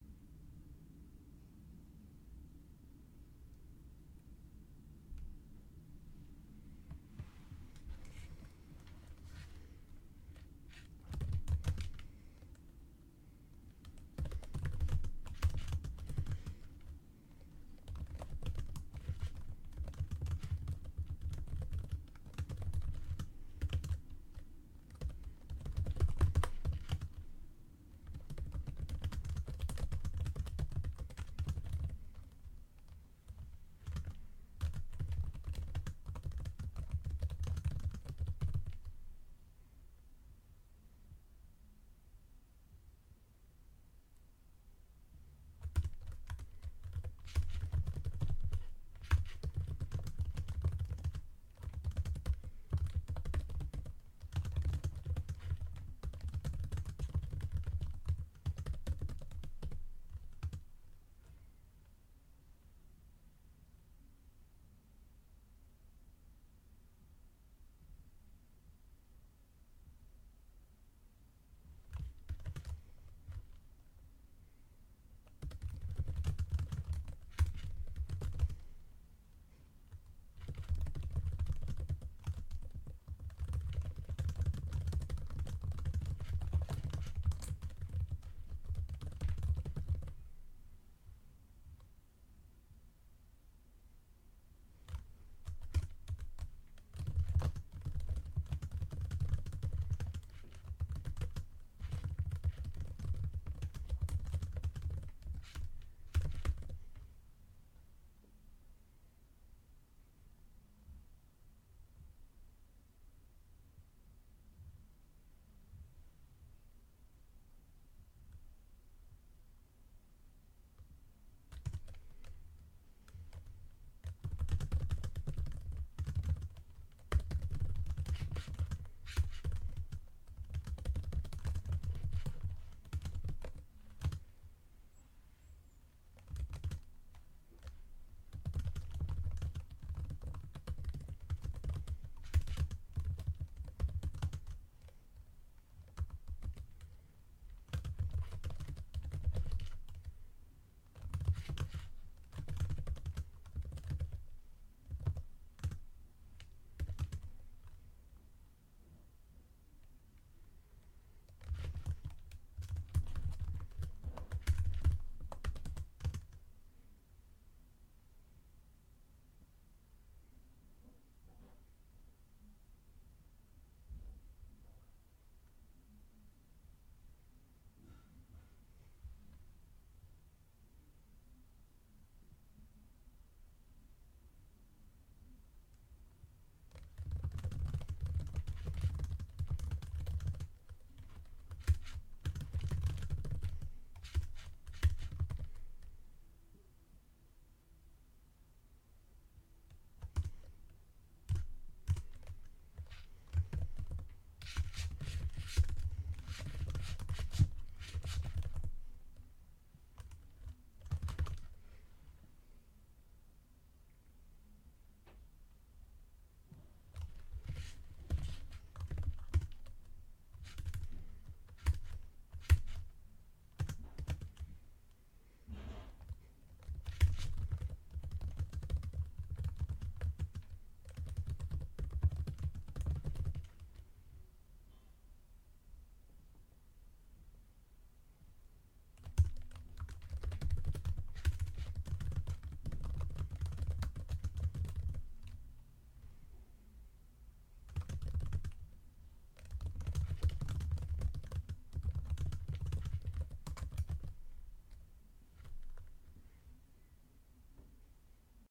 Typing with bracelet On
Typing quickly on a computer (MacBook Pro) with a bracelet or watch on.
bracelet, computer, fast, quickly, Typing, watch